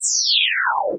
Created with coagula from original and manipulated bmp files.